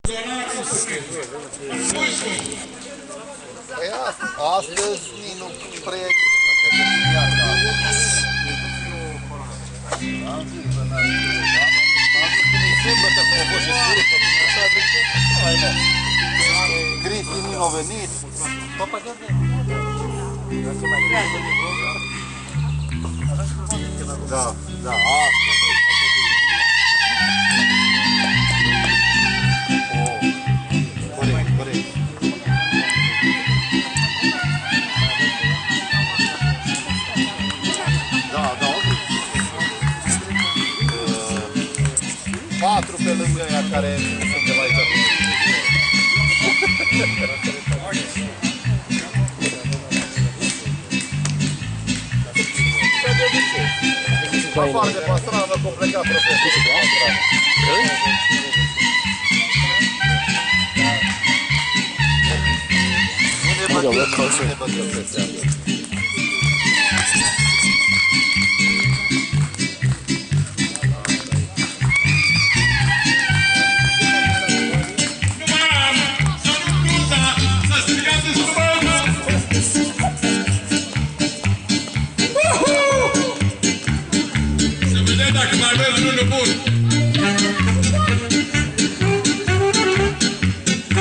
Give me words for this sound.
Man plays a song on a leaf from a nearby bush
Recorded at a Romanian Spring Festival in Lilburn, Georgia.
stage, performance, field, recording, romanian, live, festival, music